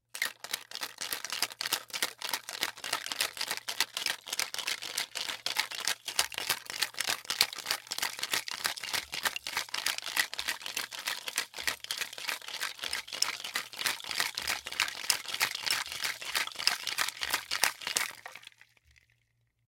Shaking Martini Shaker FF300
Shaking martini shaker loud, fast, ice hitting metal surface loud
ice, martini, metal, shaker, Shaking